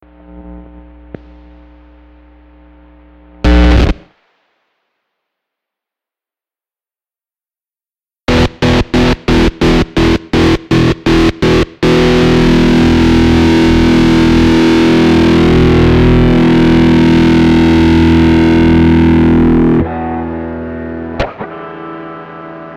The sound of a live guitar, two pickups with one pickup turned off. Rapidly switching between the one that is off and the one that is on. You know you have heard this technique used before on all the 70's rock albums.
Then the pickup switch effect.